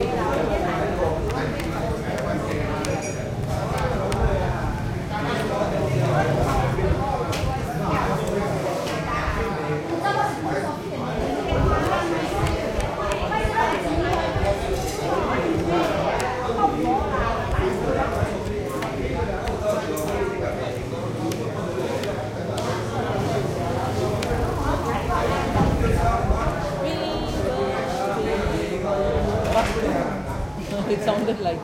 Chinese Stall Pangkor Town
A chinese Noodle restaurant in Pangkor town
Chinese, Crowd, Foodplace